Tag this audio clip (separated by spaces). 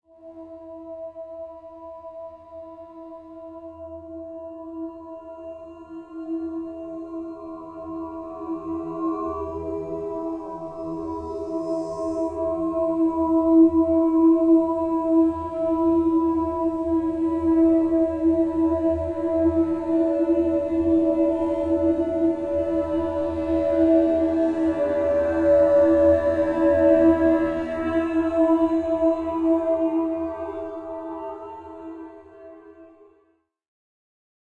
ambience atmosphere choir church cinematic electro processed synth voice